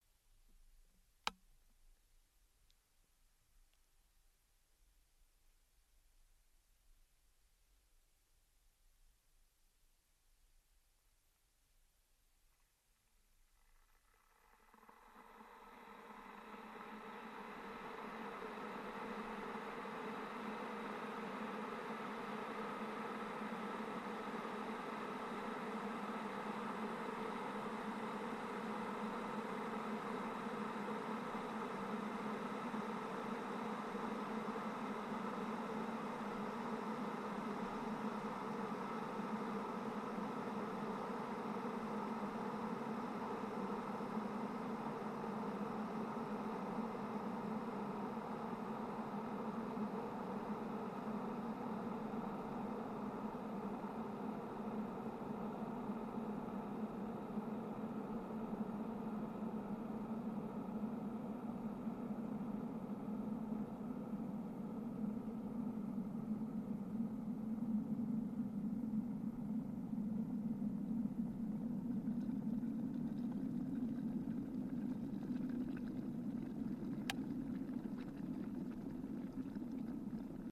kettle F mon semi anechoic
Recording of a kettle from turning on to boiling. Recording in semi-anechoic chamber at University of Salford. Pack contains 10 kettles.
anechoic, kettle, high-quality